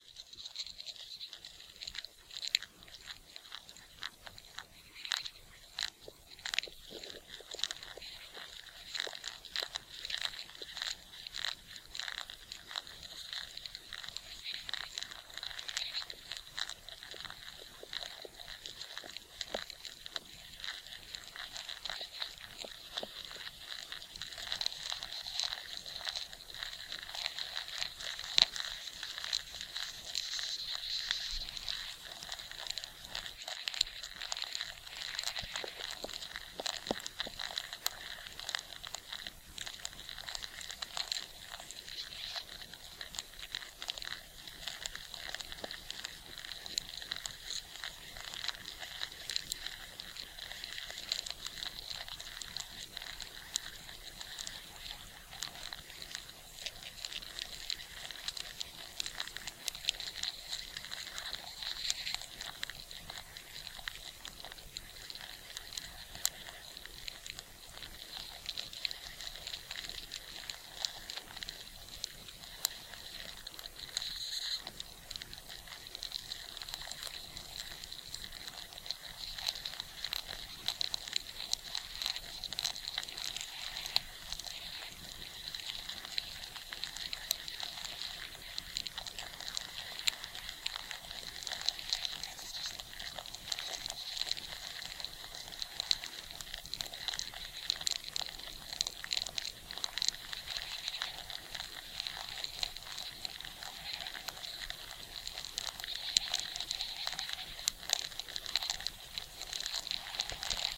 Ants. Hormigas
This is the sound of ants in a dead tree.
ZOOM H2
Contact mic